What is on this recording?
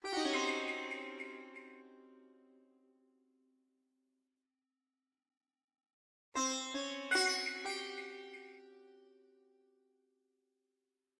These sounds were created from Xpand!'s sitar sound font and Musescore's clave sound font. They were used in an ambient track simulating the sounds of exploring an ancient Egyptian tomb.
This could be used as a transition, when discovering treasure or a secret passage, or any number of events that need an Egyptian or middle-eastern flavor.